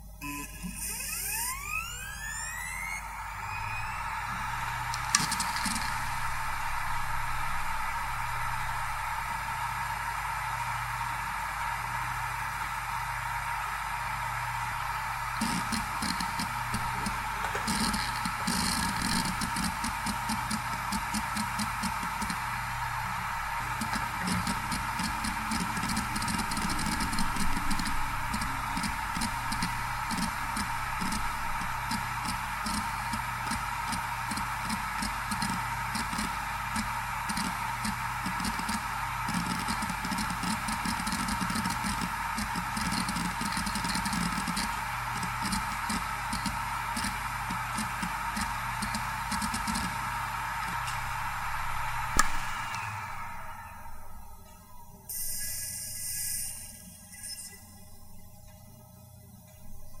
Samsung P120 - 7200rpm - FDB
A Samsung hard drive manufactured in 2005 close up; spin up, writing, spin down.
This drive has 2 platters.
(sp2504C)